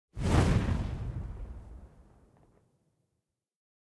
FX FLASH-009
There are a couple of transitions that I recorded.
FLASH, TRANSITION, WOOSH